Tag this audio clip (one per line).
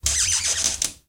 creak
door
creaking
hinge